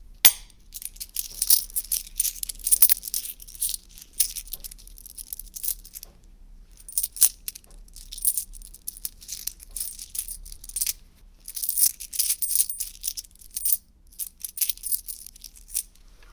handling quarters
picking up and holding several quarters
change, currency, metallic, handling, pick-up, quarters, metal, coin, quarter, money, cash, coins